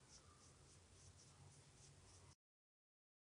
pipa que es limpiada suavemente